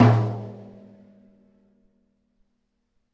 tom mid
Individual percussive hits recorded live from my Tama Drum Kit